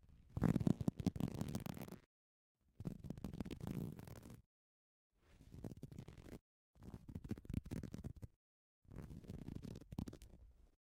I needed something that sounds like a growing mushroom or something like that.